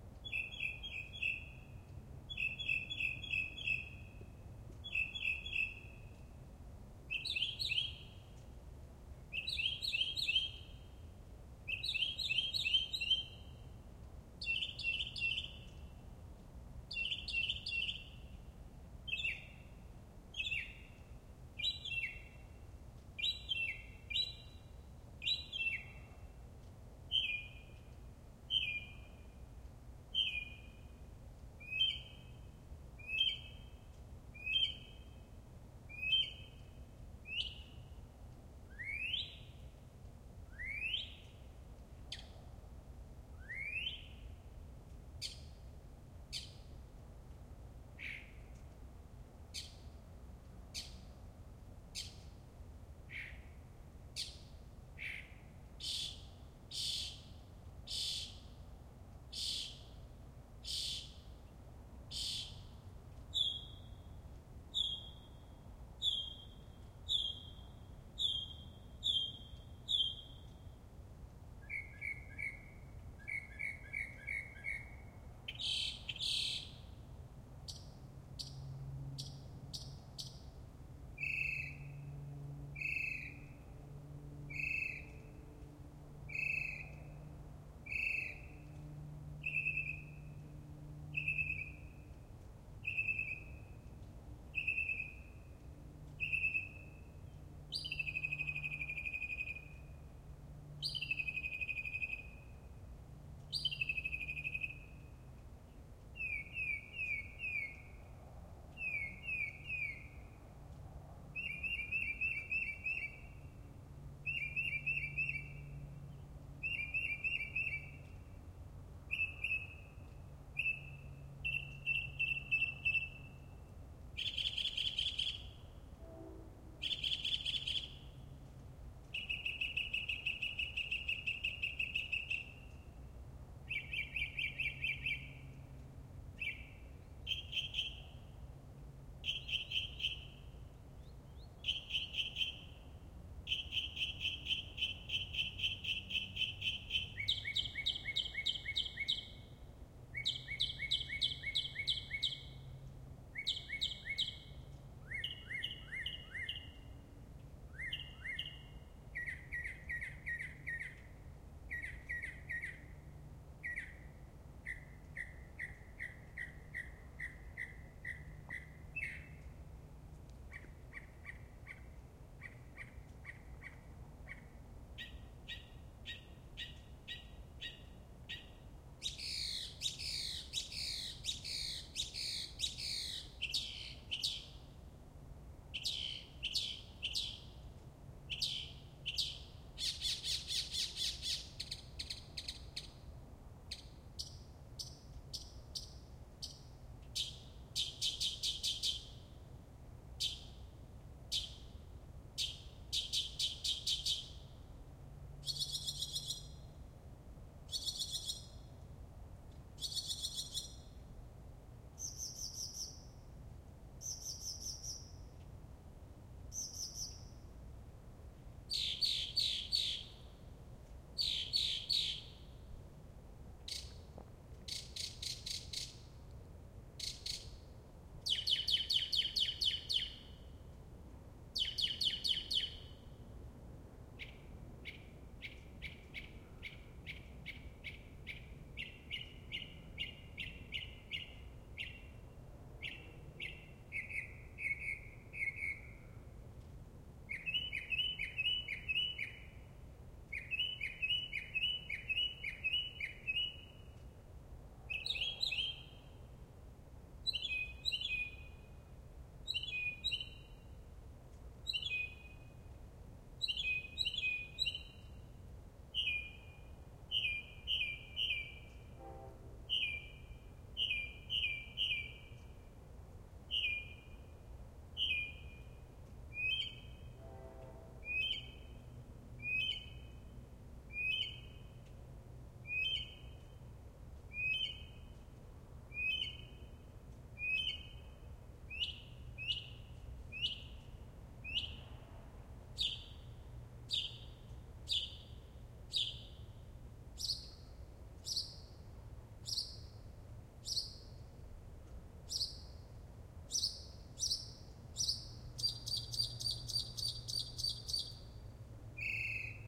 Mockingbird at Midnight (New Jersey)
A mockingbird singing at midnight. Some suburban sounds can also be heard, such as a train horn. A passing insomniac wondered whether I was making all the noise, and I told him no, it was a bird.
EM172, spring, Primo, suburban, field-recording, suburbs, midnight, birdsong, H1, night, bird, nature, mockingbird, Zoom, new-jersey